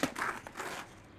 Skateboard Pop Shove-It Revert II
These field-recordings were captured for a radioplay. You can hear various moves (where possible described in german in the filename). The files are recorded in M/S-Stereophony, so you have the M-Signal on the left channel, the Side-Information on the right.
sports, m-s-stereophony, skateboard, field-recording, wheels